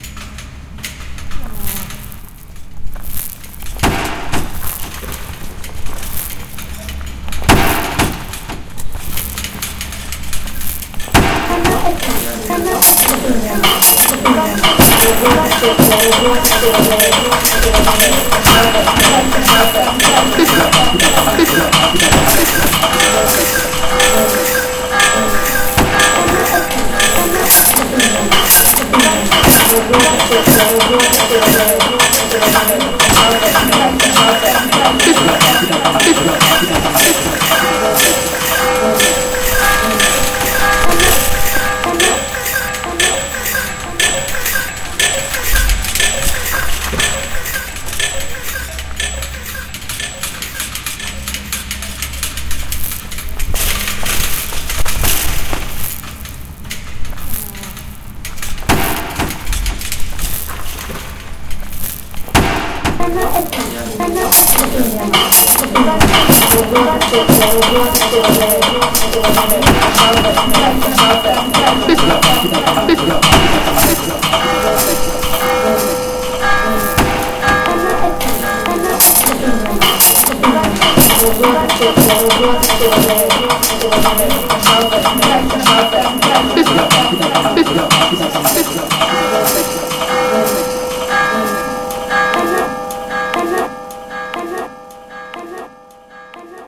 Karelian Pies Fall From The Sky
Composition worked with Garage band. field recordings of Hailuoto/ Finland composed combining fragile and violent sounds.
fieldrecordings, Finland